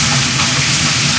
The rain was heavy where I'm at at the time I was recording this. Recorded with my Samson C03U microphone.